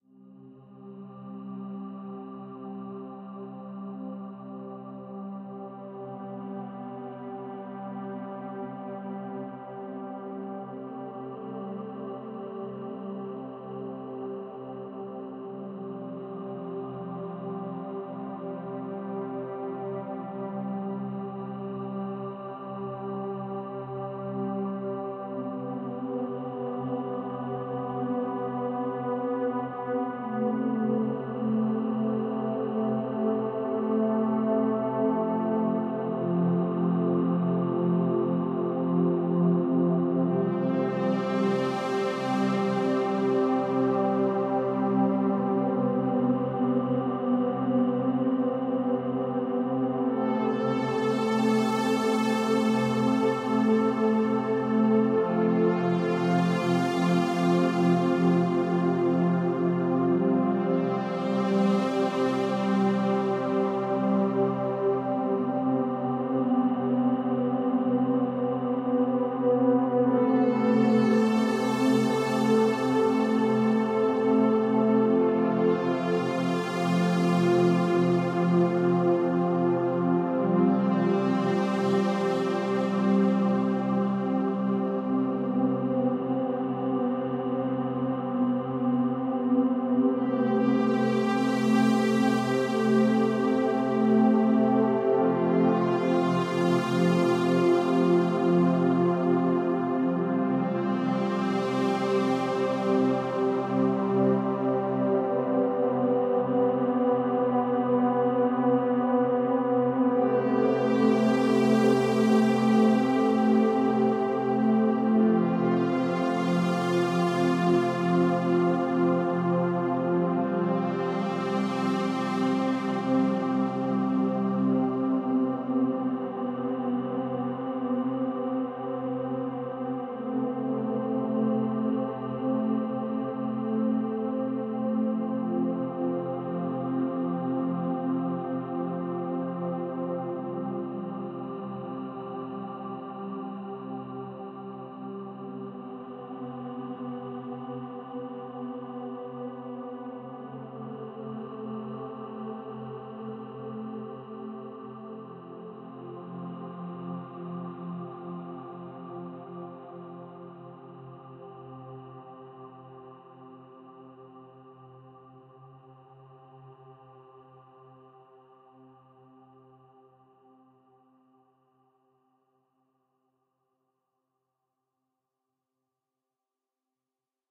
This is is a track with nice sound. It can be perfectly used in cinematic projects. Warm and sad pad.
Music & Project Files: DOWNLOAD
Regards, Andrew.
melodic, space